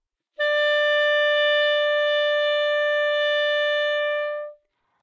Sax Alto - D5
Part of the Good-sounds dataset of monophonic instrumental sounds.
instrument::sax_alto
note::D
octave::5
midi note::62
good-sounds-id::4699
sax, single-note, alto, D5, multisample, neumann-U87, good-sounds